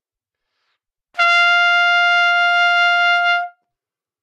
Part of the Good-sounds dataset of monophonic instrumental sounds.
instrument::trumpet
note::F
octave::5
midi note::65
good-sounds-id::2847
F5, neumann-U87, single-note